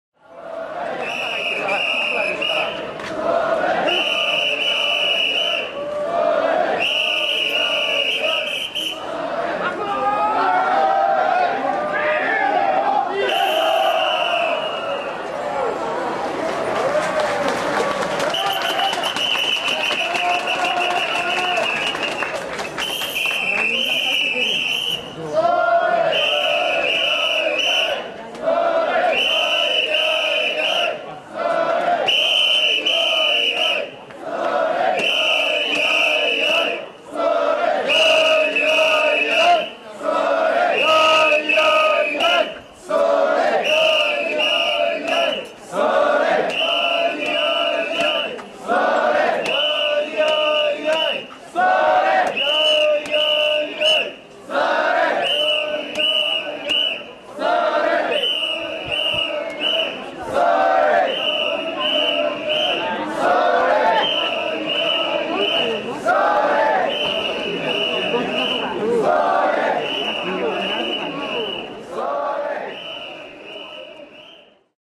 A recording made during the Tennjin festival in Osaka Japan. Men carrying a large heavy cart during a procession.